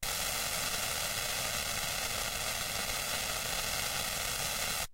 minibrute noise01
Sound made with the Arturia Minibrute.
analog, minibrute, synth, synthesizer, synthetic